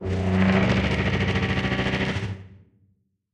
chair dragon shudder
Processed recordings of dragon a chair across a wooden floor.
beast, call, creature, dragon, growl, monster, roar